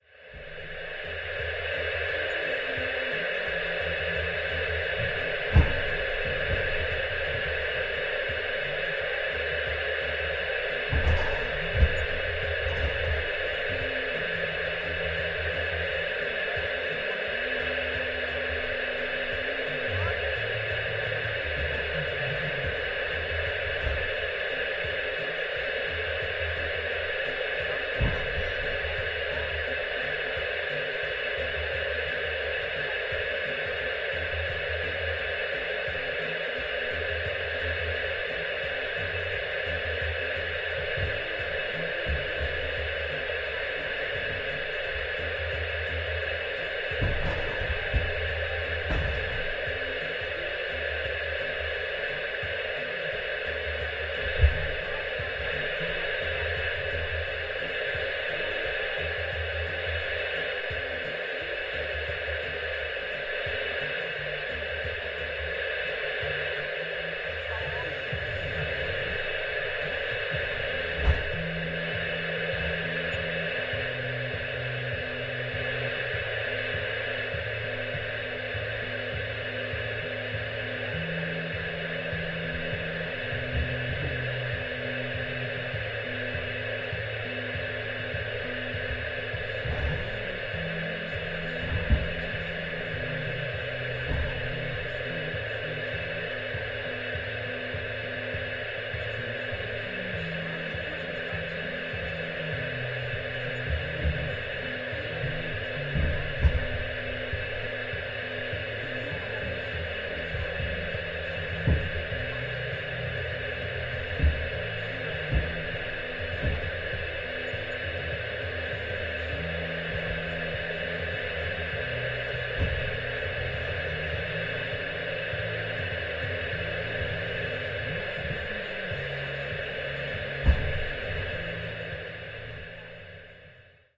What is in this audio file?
040815 szum cb radia
04.08.2015: noise of a CB-radio recorded inside the truck cabin. Recorder Zoom H1.